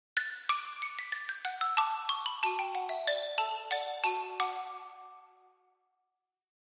Xylophone scale descent improv
Short passage improvised on a Kontakt factory sample-library xylophone.
xylophone, scale, sample-instrument, melody, short-phrase